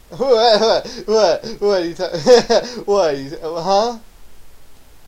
gibber gabber
Gabber, Gibber, Scribble